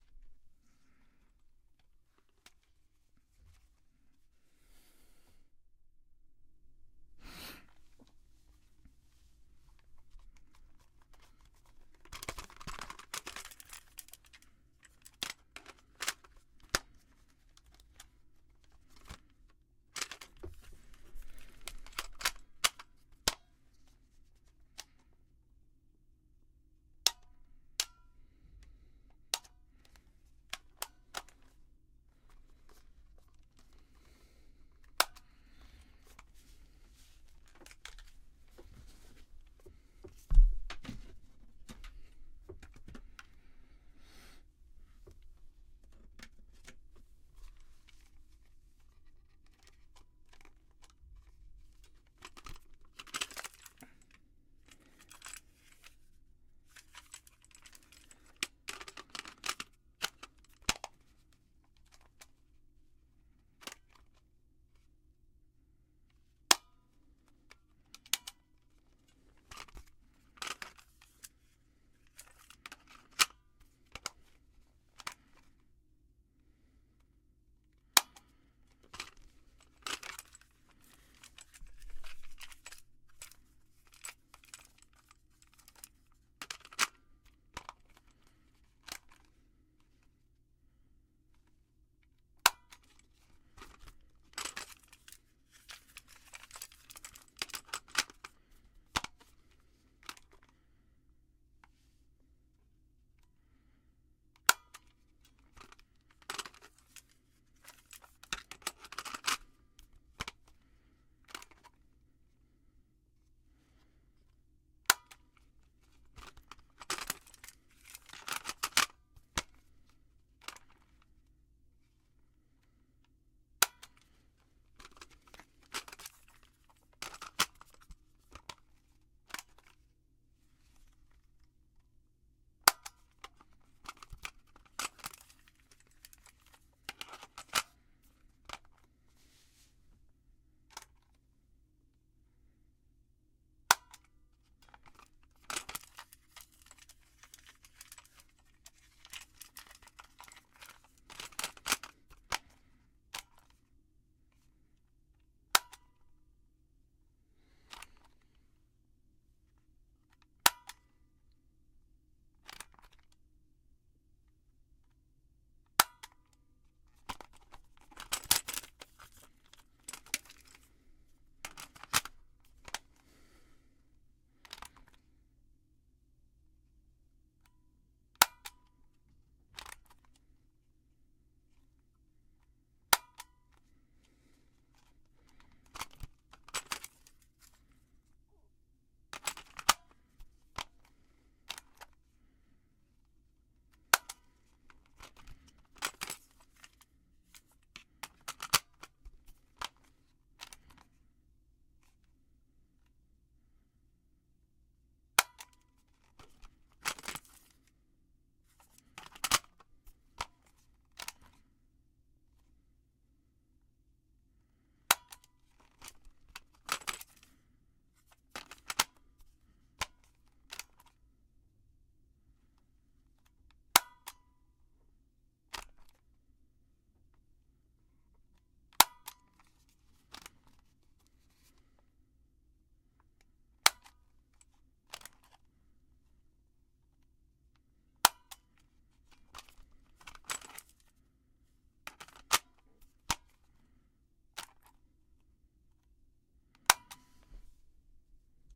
Cassette Player and Tape Fiddling
door, recorder, fiddling, button, tape, cassette, eject, player